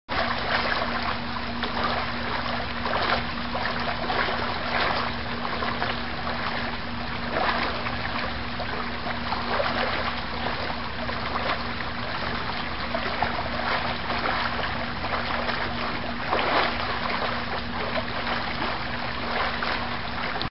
the rain
field recording of a flood